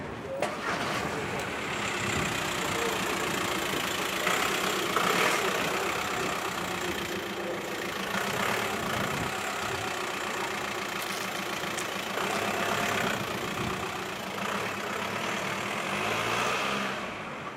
FX - vehiculo arrancando